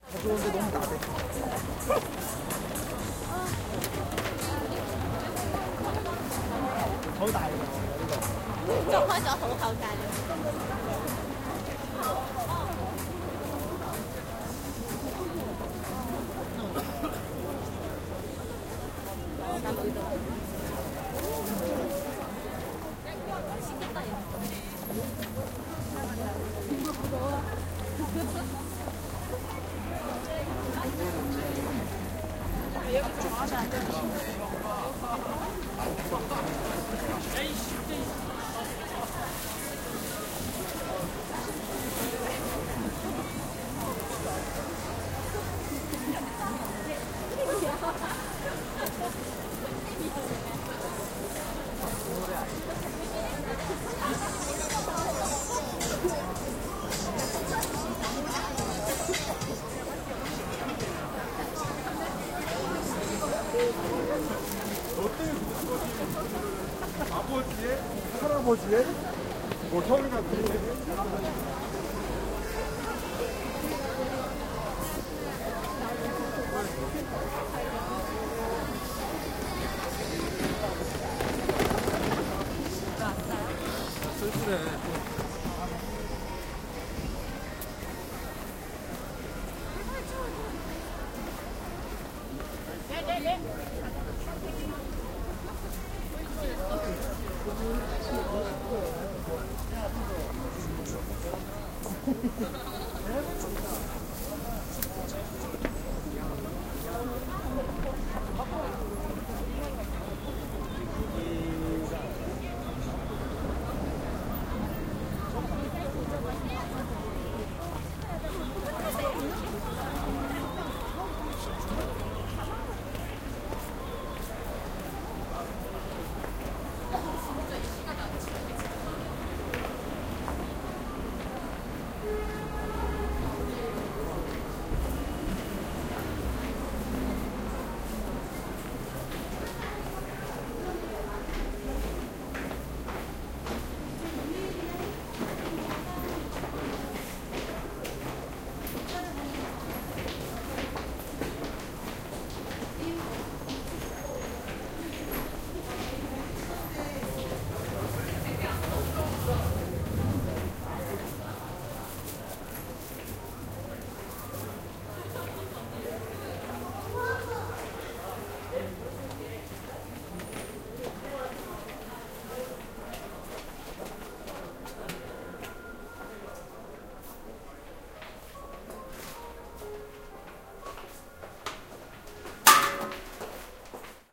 0173 Myeong-dong to metro
People in a busy street. Talking Korean. Music in the background. Getting in the subway metro.
20120212
field-recording, korea, korean, metro, seoul, street, voice